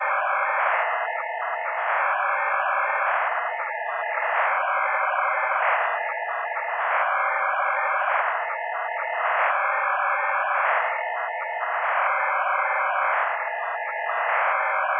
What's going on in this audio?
Created with coagula from original and manipulated bmp files. Fax modem dial up sound for alien behind the tech curve.
modem, image, fax, dialup, synth, space